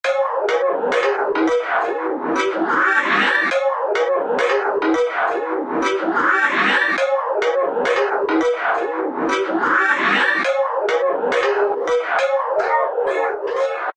Getting rid of it
pad, synth